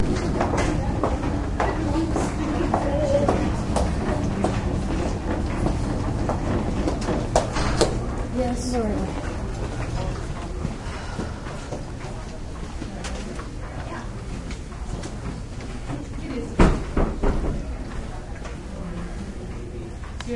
Inside a hospital.